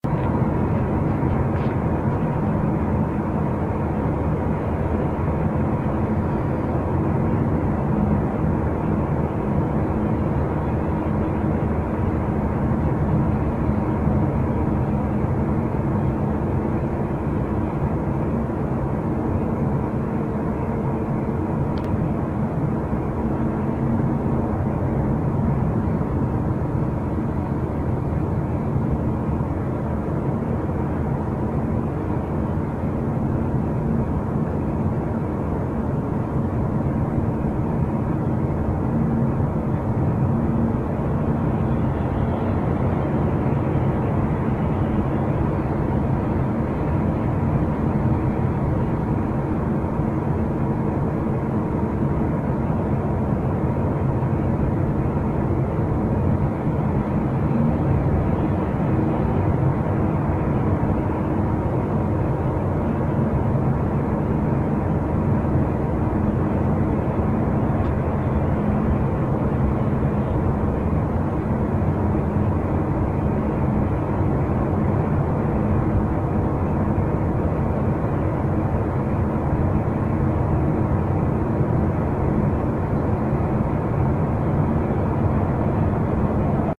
Ambience City near Road winter record20160106103258
Recorded with Jiayu G4 for my film school projects. Location - Russia.